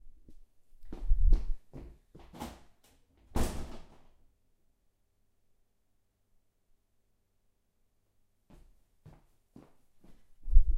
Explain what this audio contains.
Sound of someone storming out of room and slamming the front door.
Recorded from a distance on Zoom H5